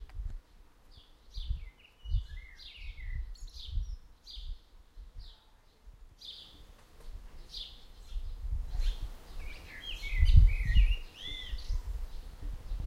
BRIDS MJH 01
ambient, birds, forest, garden, nature